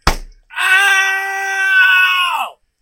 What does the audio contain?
OWWWWWWWWWWWWWWWWWW! Recorded with a CA desktop microphone. Not edited. (It didn't actually hurt, it's just me slamming the desk.)